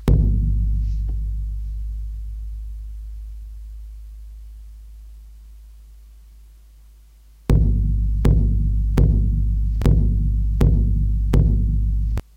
Wanted a drum with deep and soft vibrations, even with long tail and a clean spectra. I designed a small numbers and selected this one. It's on the spot. The cheap microphone I use may not register the wide and clean sound.
made, home, drum